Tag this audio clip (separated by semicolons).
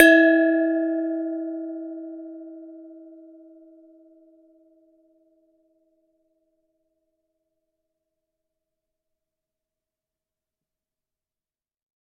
Vietnam; gong; percussion; metal